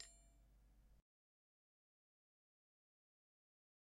Heatsink Small - 24 - Audio - Audio 24
Various samples of a large and small heatsink being hit. Some computer noise and appended silences (due to a batch export).